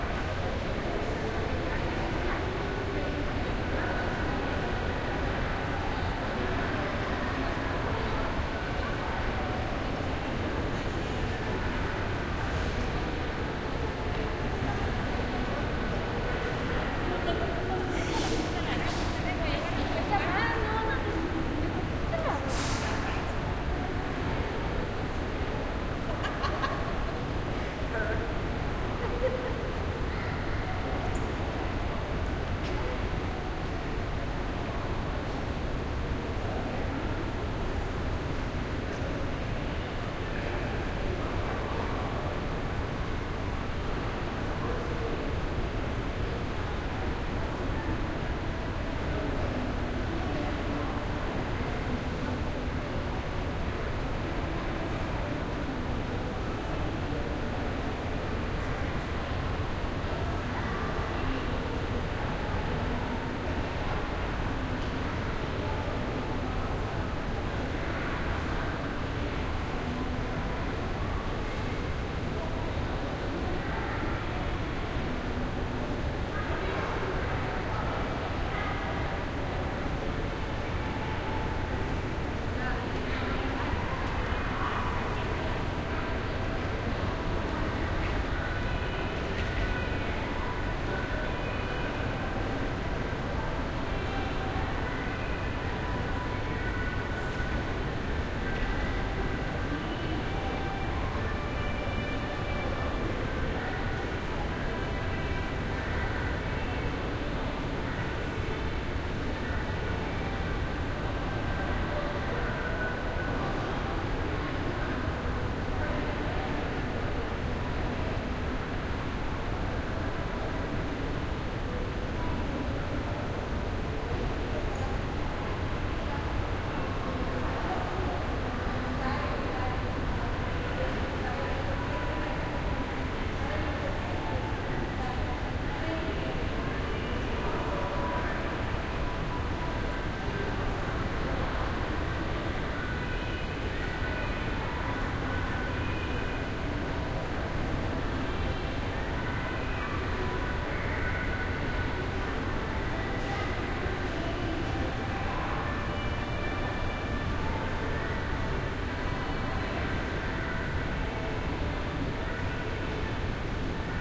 Mall, Distant Music
People move through a shopping mall, with soft pop music playing overhead.
ambience, mall, music, people, shopping